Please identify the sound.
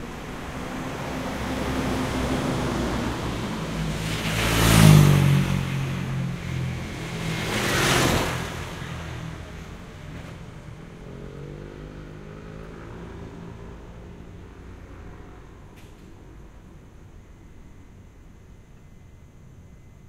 scooters pass bys
I had just received my new Busman modded Tascam DR-680 and I was eager to try it out. This is a simple short street recording in Taiwan where I captured a couple scooters passing by.
Nothing fancy here. I'm just hoping to get some comments on the overall sound of this unit.
Wind Protection: None (I just got it. No time to wait!)
Position: about 1 1/2 feet off ground, side of road
Location: Koahsiung, Taiwan (Fongshan District)
scooter, busman, mod, pass-by, AT825, traffic, Taiwan, dr-680, street, tascam